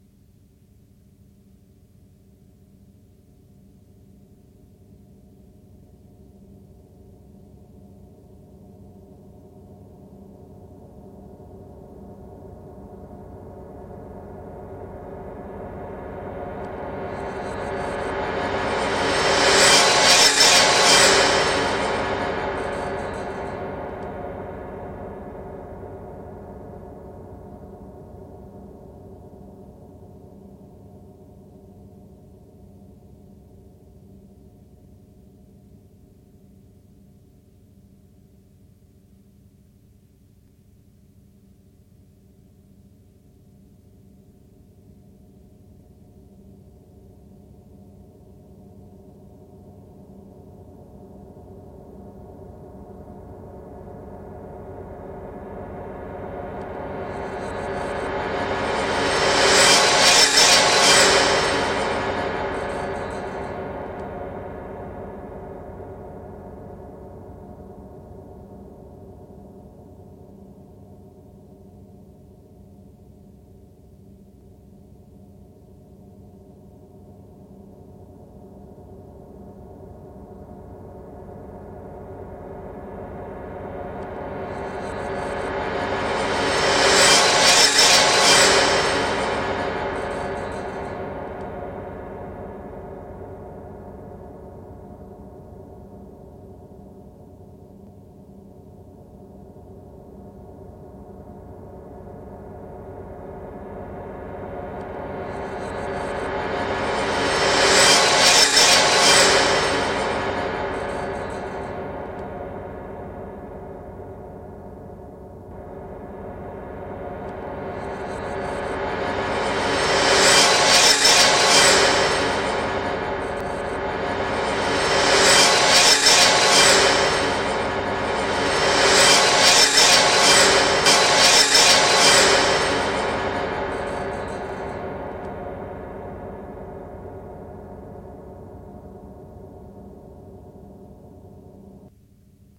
This acoustic thunder illustrates enemy attacks from the air, wave after wave of diving.
aircraft, attack, thunderbolt